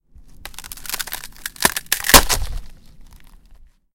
Snapping, Wooden Fence, J
Raw audio of snapping a wooden fence panel. I needed to get rid of an old fence, so might as well get some use out of it.
An example of how you might credit is by putting this in the description/credits:
And for similar sounds, do please check out the full library I created or my SFX store.
The sound was recorded using a "H1 Zoom V2 recorder" on 21st July 2016.